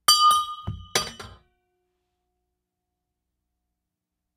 metal pipe 1
Making noise with a 2in galvanized metal pipe - cut to about 2 ft long.
Foley sound effect.
AKG condenser microphone M-Audio Delta AP
foley, pipe, soundeffect